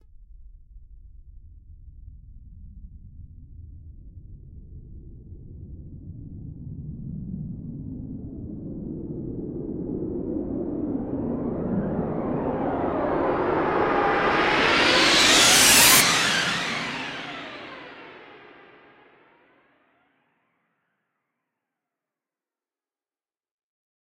A buildup Whoosh!